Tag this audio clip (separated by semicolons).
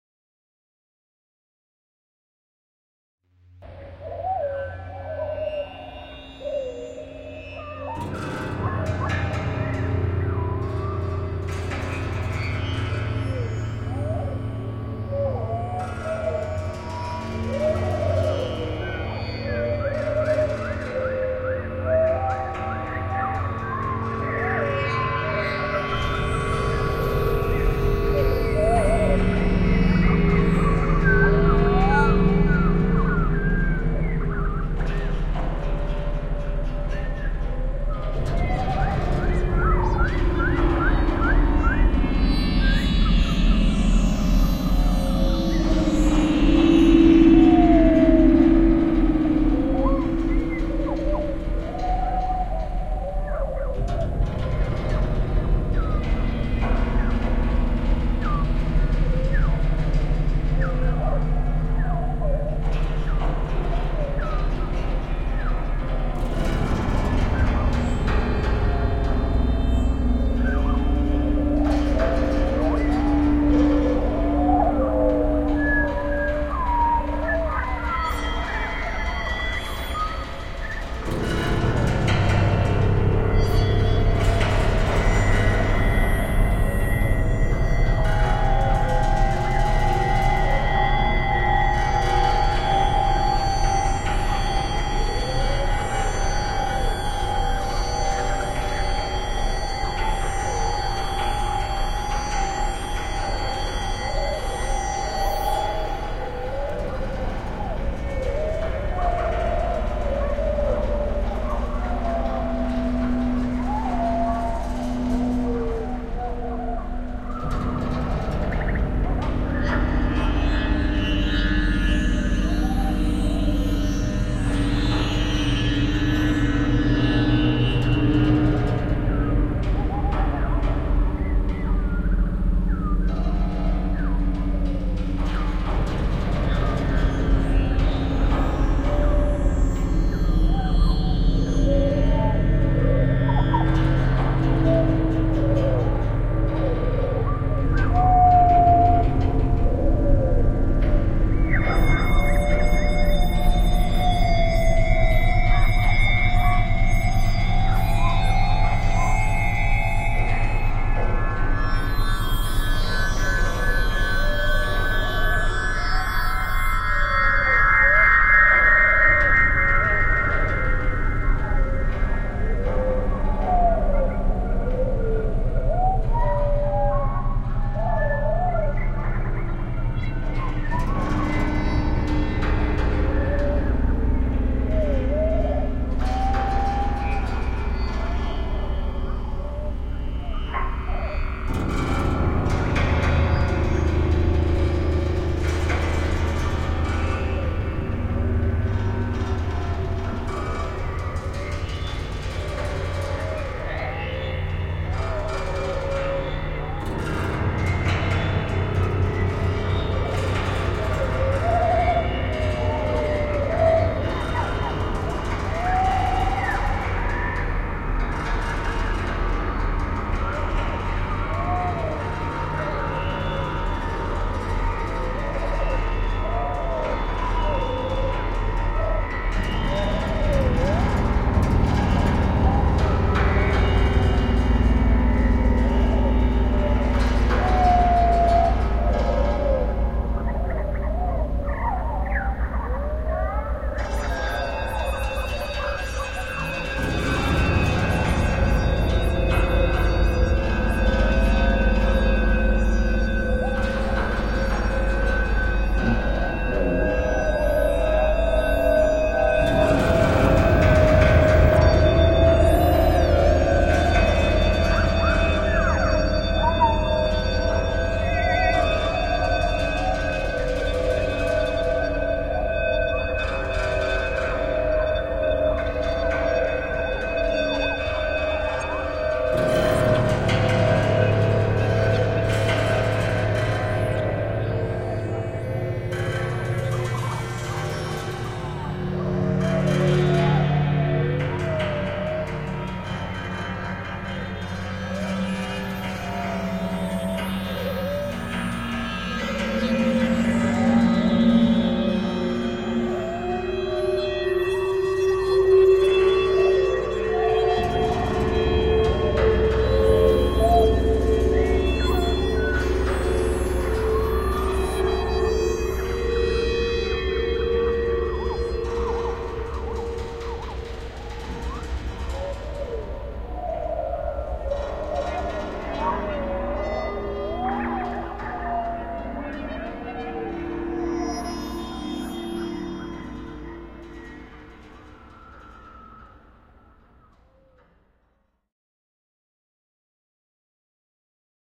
birds; atmospheric; ambient; abstract; ambience; atmosphere; electronic; soundscape; piano-string; waterphone